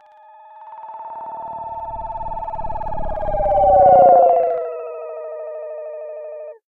A cartoony futuristic "hover car"